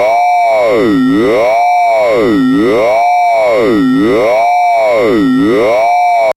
quantum radio snap016
Experimental QM synthesis resulting sound.